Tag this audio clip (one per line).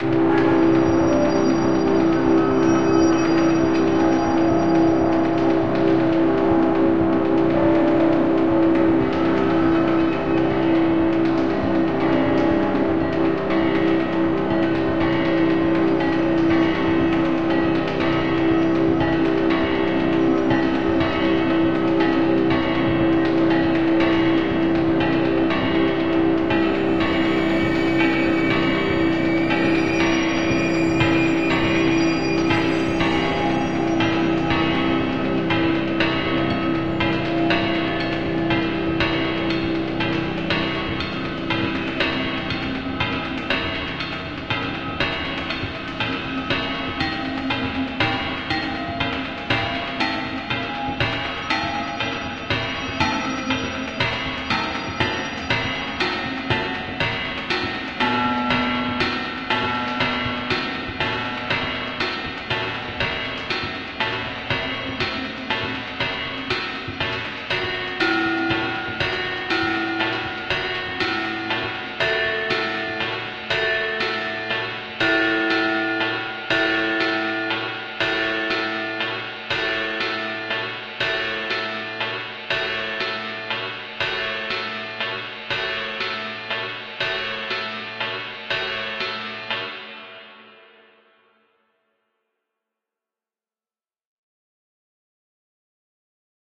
ambience
deep
drone
space
soundscape
impact
atmosphere
alien
sounds
future
ambient